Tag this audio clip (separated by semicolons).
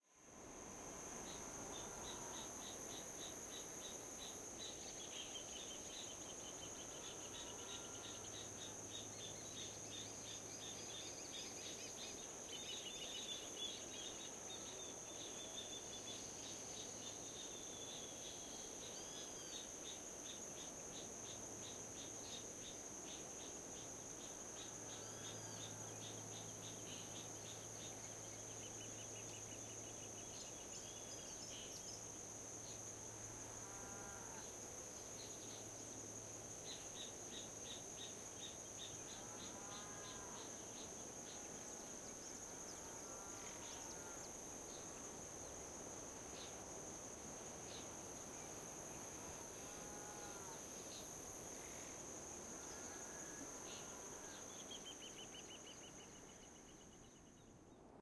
ambiance; ambience; ambient; america; birds; calm; cicadas; country; countryside; cow; dawn; farm; quiet; soft; soothing; uruguay